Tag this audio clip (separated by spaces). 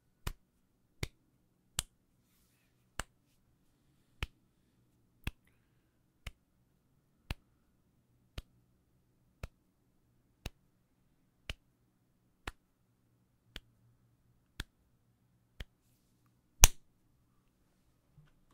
foley hands hand